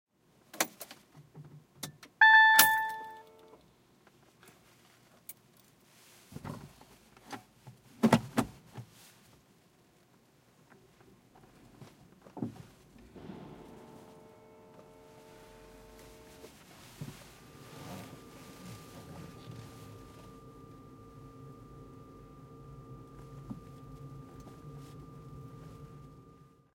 MITSUBISHI IMIEV electric car START with key
electric car START